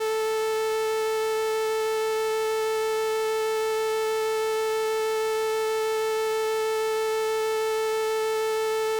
Transistor Organ Violin - A4
Sample of an old combo organ set to its "Violin" setting.
Recorded with a DI-Box and a RME Babyface using Cubase.
Have fun!
70s, analog, analogue, combo-organ, electric-organ, electronic-organ, raw, sample, string-emulation, strings, transistor-organ, vibrato, vintage